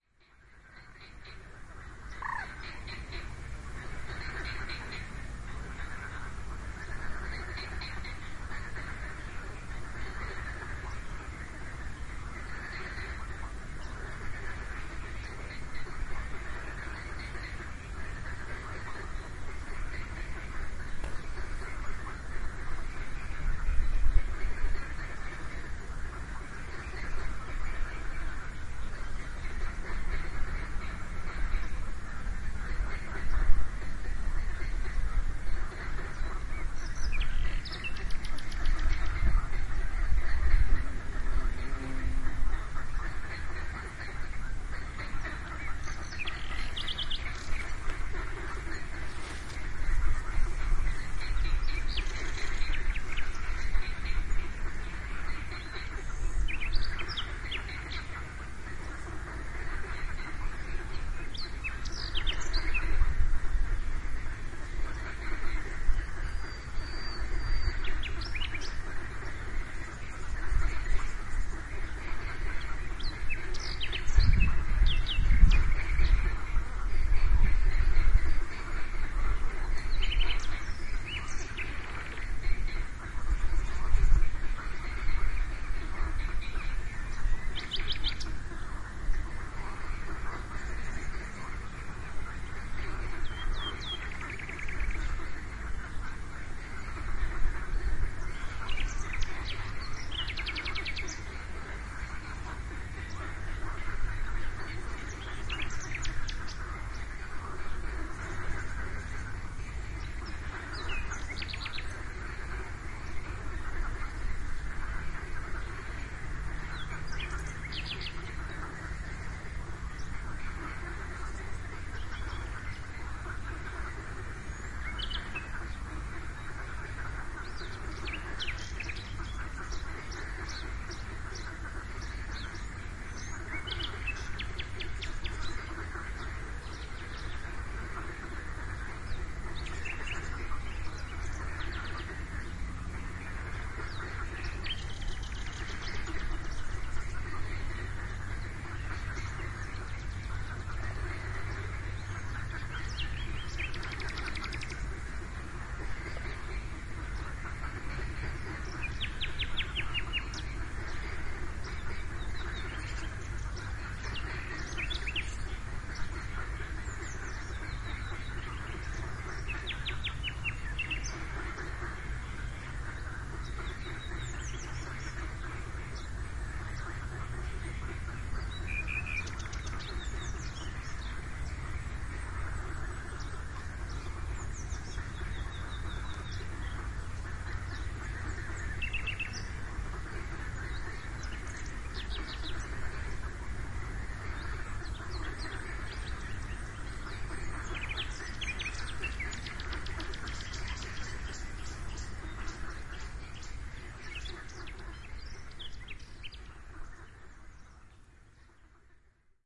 Lakeside Sounds
Lakeside nature sounds during spring time. pamvotis lake, Ioannina, Greece.